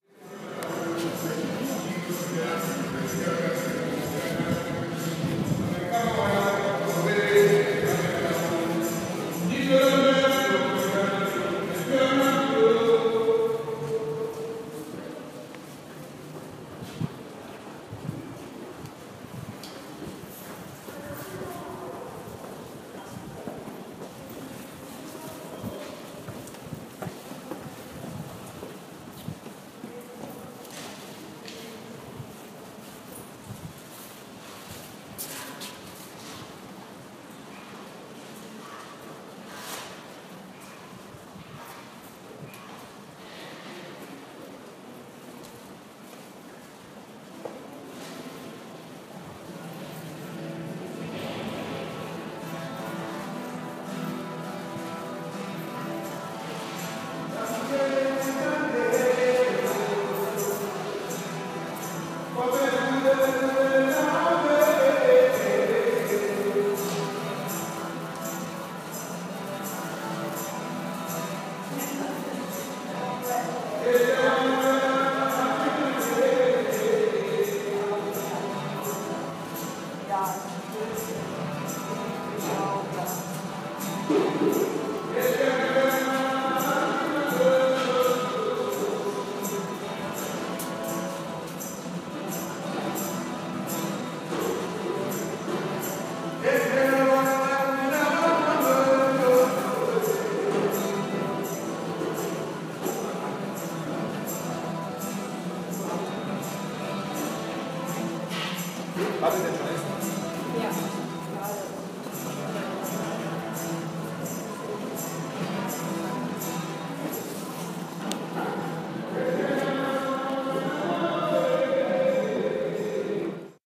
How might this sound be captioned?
jamaican singing in subway recorded far away
an old jamaican guy playing the guitar and singing recorded downstairs; nice hall
away, dude, far, guitar, guy, jamaican, old, playing, recorded, sings, subway